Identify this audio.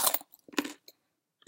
An eating sound ideal for a video game or movie. Made by recording me chewing a carrot with Ableton.